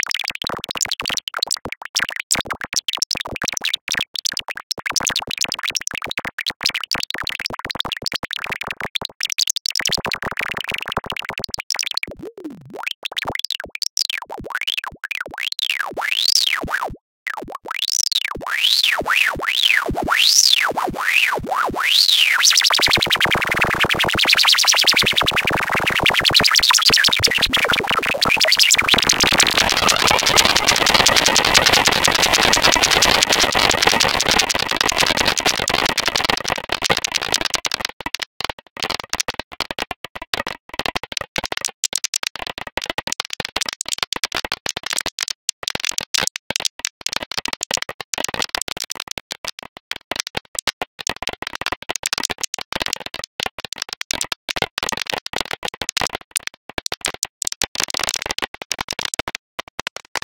This is a sound made through SynthEdit: A noisewave is constantly compared to a threshold, if it's over then it passes through, altered during the entire sample. This generates short clicks of noises. The click is then processed through a lowpass filter with controllable cutoff and resonance. The cutoff is further tampered by 3 vibratos that has from very low frequencies to so high frequencies that it is actually ringmodulating the sound (0.30). The sound is ending with some ringmodulated clattering noises. Let me first say this before you ask. I've got no plans for releasing this sound generator as a VST plugin. I made this when I was pretty boozed.
clatter, dry, effect, filter, noise, random, ring-modulation, shatter, synth, vibrato